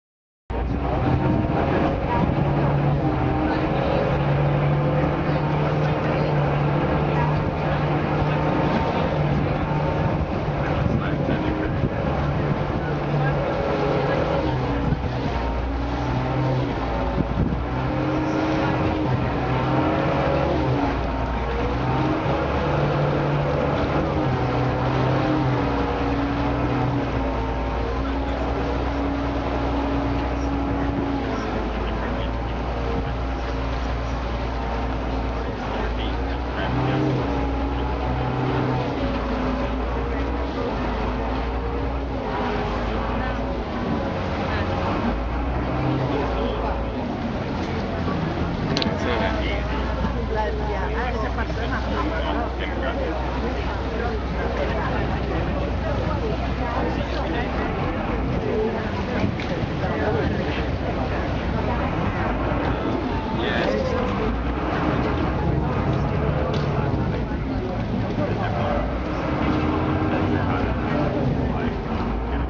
Field recordings extracted from videos I took while travelling on a vaporetto (small public transport canal boat) along the Grand Canal. You can hear the water, the engine revving as the boat criss-crosses the canal from one stop to the next and the(mostly English)chatter of other passengers on the boat. On "Venice2" horns are blown by other vessels and someone says at the end "I'm getting off the boat". I have joined 2 tracks on this one and there is a small gap, but with the right equipment that can be edited out. All in all very evocative of the busy atmosphere in Venice, even in March (2012).
Grand-Canal, Venice-Italy, ambience, boat-engine, busy-river, chatter, engine-noise, river-traffic, tourists, vaporetto